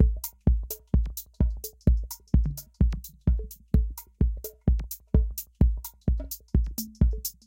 MIDI/OSC lines generated with Pure-Data and then rendered it in Muse-sequencer using Deicsonze and ZynAddSubFX synths.
loop, modern, ambient, elektro, electronika, electro, techno, electronic, synth, bpm-128, music, new, 4